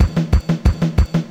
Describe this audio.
Even more loops made with software synth and drum machine and mastered in cool edit. Tempo and instrument indicated in file name and or tags. Some are perfectly edited and some are not.